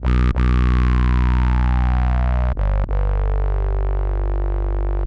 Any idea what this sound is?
basslow horror 95
A low bass beat for a hip-hop song
hard, techno, house, bpm, bass, low, trance, rumble, 95, vibe